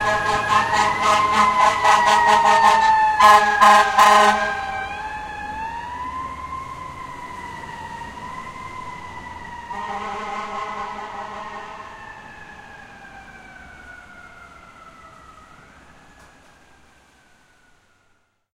Firetruck w horns 0415
FDNY firetruck with blaring horns
horns, firetruck, siren